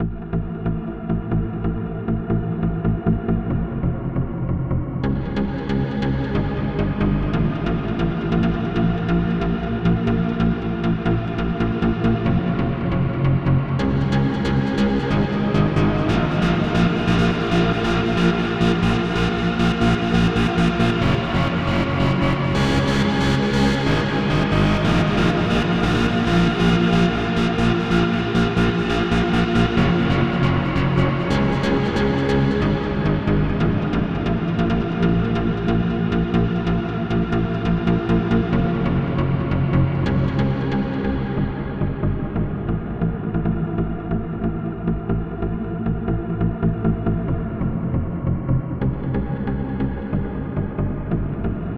did this on keyboard on ableton live hope u like it :)